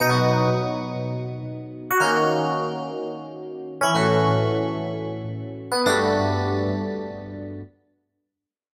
Four Jazz chords played over an electric piano (Rhodes)